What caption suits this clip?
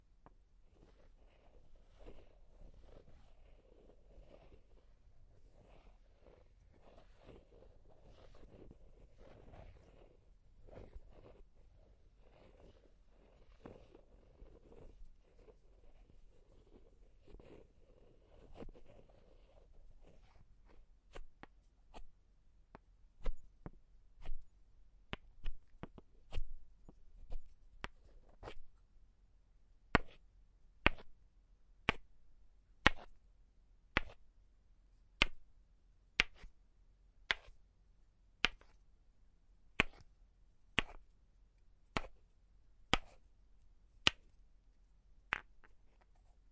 wood balls friction, scrape
Medium to small sized wooden balls rubbing against each other.